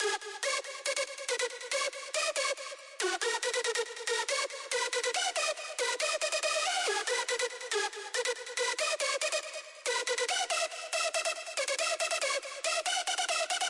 140 BPM Stutter Lead (EDM Trance) G Sharp Minor
Lead, Dance, 140-BPM, Sample, Trance, G-Sharp, Electric, Music, Loop, EDM